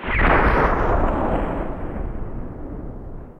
TS FX Korgtriton2 (7) 3
Nice FX sound
electronic, fx, hip-hop, hit, house-music, loop, music-loop, sample, sound, stab, synth-loop, trap, trap-music